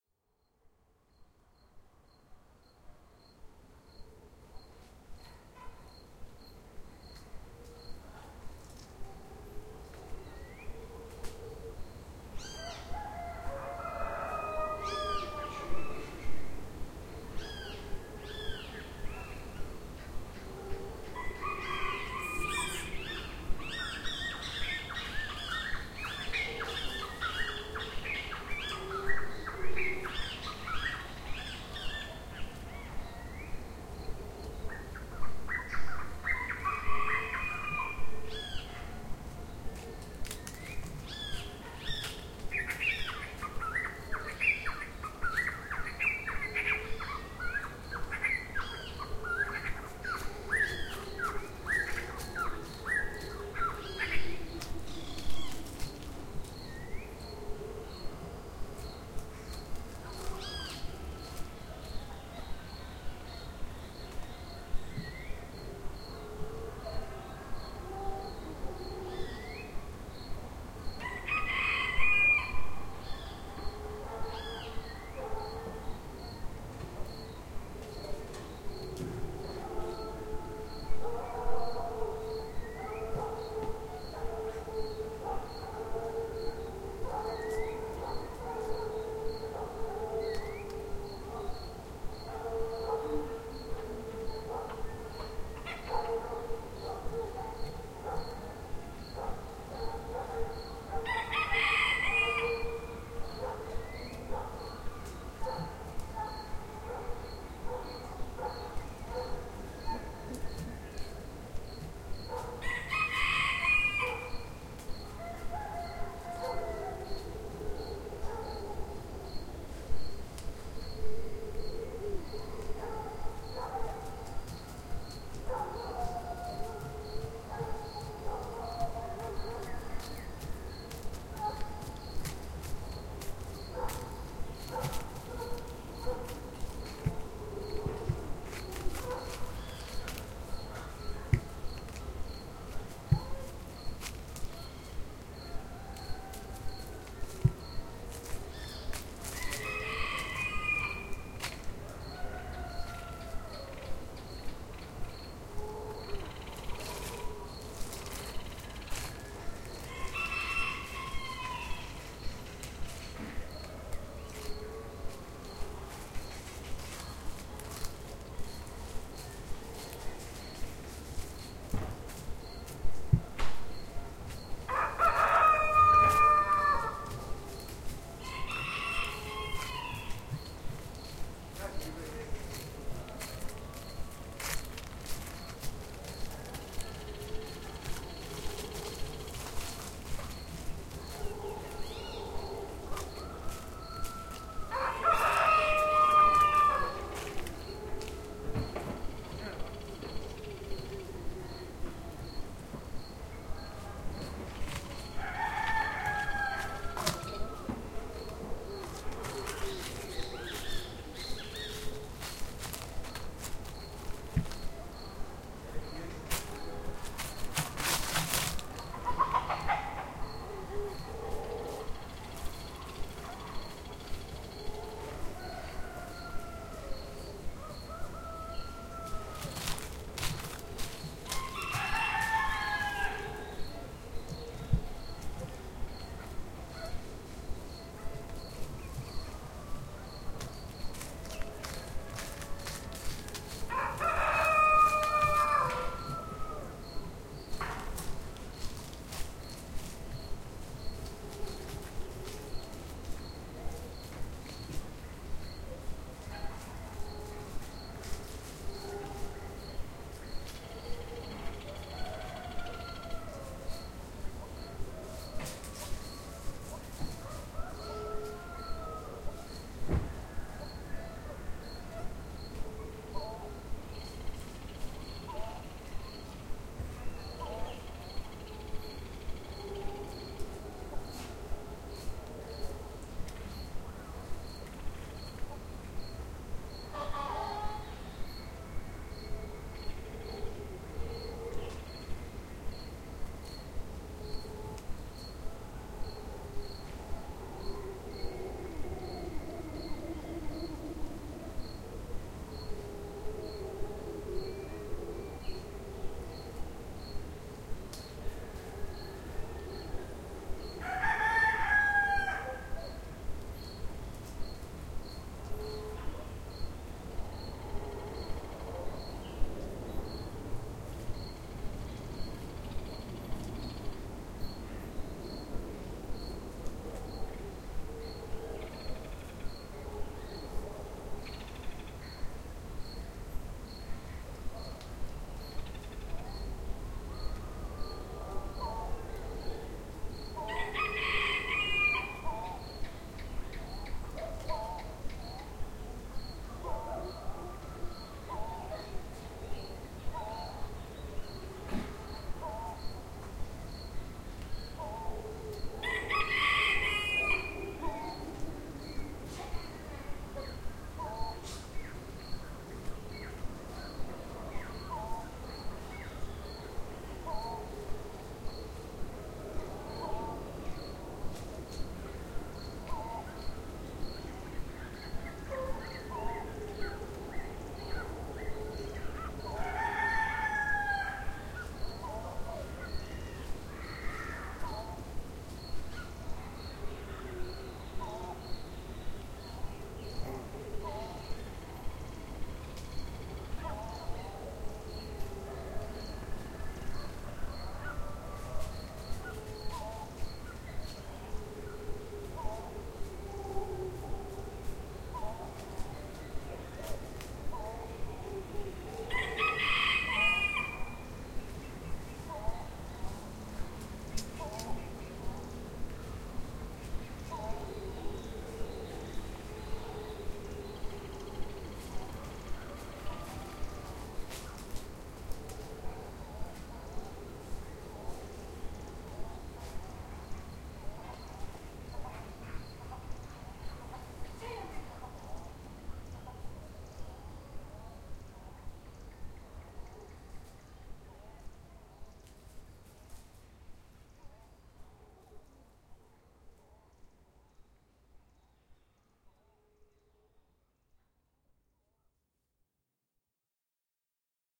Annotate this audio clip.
Marberlla Guanacaste Costa Rica
Zoom H1 / 5 30 am / Jan 27
there are sounds like steps, this are some chickens around the mic. and also there are some barks that you can hear the Marbella's acoustics... lovely!!!
I hope this might be really useful in the future when AIs will domain the world!
this is all you need to know in this momento!
Cheers